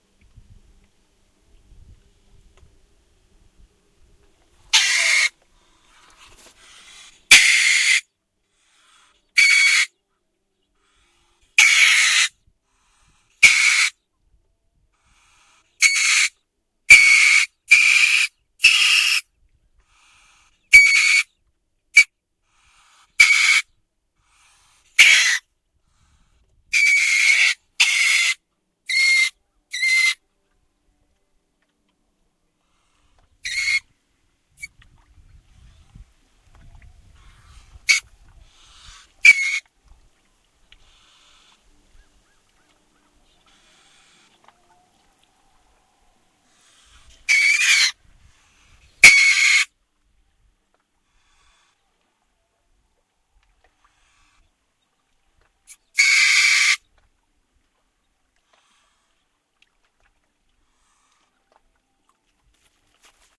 river otter or weasel 2006-09-17
I believe this to be a weasel, but it could have been a river otter, asthe only part of the animal I saw was the snout down a dark hole. Theanimal was amidst the riprap at the end of our garden, and beingtormented by two neighbourhood dogs. I shooed the dogs away, but theanimal continued to shriek for some time. ===FYI - I saw an animal swimming in the river a few days later, and am 98.5% certain it was a river otter. =====2007-09-07OK. My biologist wife and I have come to the conclusion that it's an American mink (Mustela vison). Poor little guy.